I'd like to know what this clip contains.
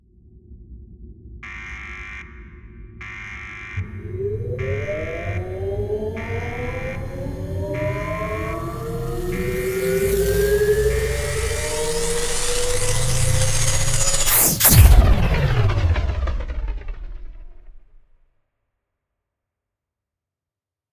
Sound design of the plasma cannon charging and firing at the space station. Enjoy it.
I ask you, if possible, to help this wonderful site (not me) stay afloat and develop further.